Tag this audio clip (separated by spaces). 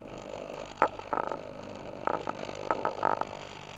piezo contact-mic coffe-machine vibration rattle expresso-cup homemade